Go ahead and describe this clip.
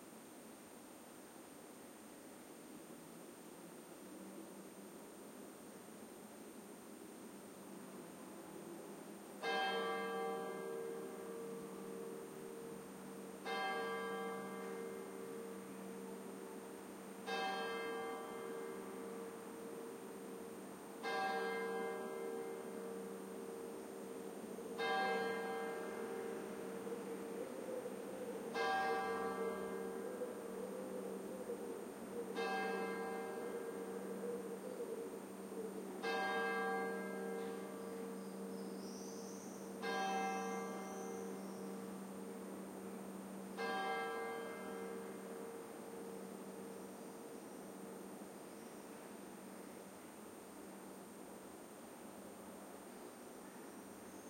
1167church clock striking ten
Church clock striking 10 pm in my quarter. Typical city background noise. Sony ECM-MS907, Marantz PMD671.
10pm, bell, church, clock, night, time